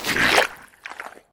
Sludge Footstep 2
Squelch, Sludge, Footstep